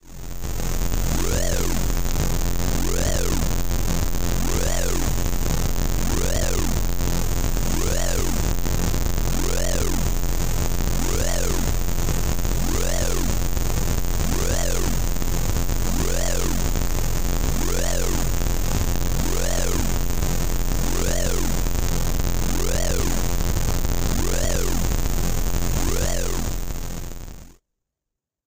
A fuzzy old recording of an emergency sound from centuries past and a long-dead civilization from the galaxy of Andromeda.